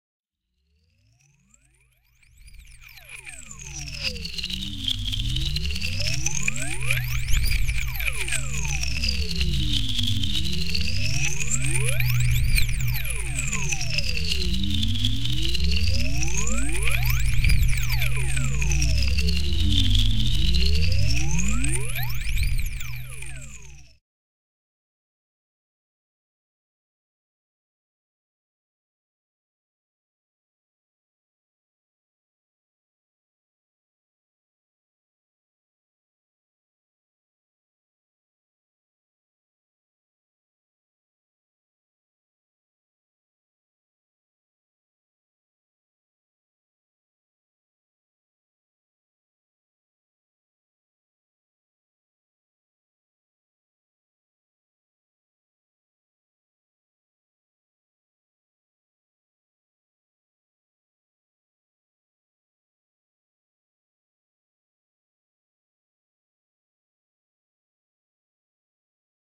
Space Whirl
Whirling spacey sound made from heavily processed VST's.
Effect, Sound, Spacey, Trippy, VST, VSTi, Whirl